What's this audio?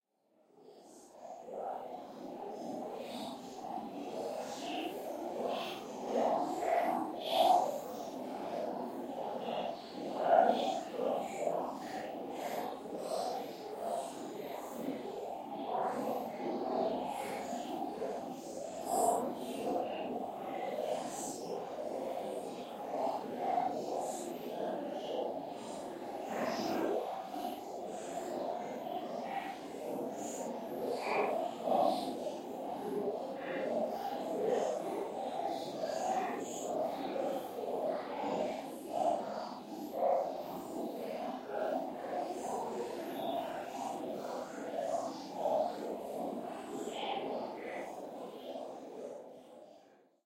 science-fiction fantasy film designed